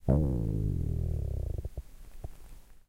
Belly rumble 3
Someone was rather hungry.
Recorded with Zoom H4n
belly, system, food, borborygmus, stomach, intestine, peristalsis, movement, driesenaar, human, fluid, intestines, digestive, gijs, hunger